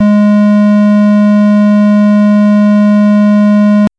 LR35902 Square Gs4
A sound which reminded me a lot of the GameBoy. I've named it after the GB's CPU - the Sharp LR35902 - which also handled the GB's audio. This is the note G sharp of octave 4. (Created with AudioSauna.)
chiptune, fuzzy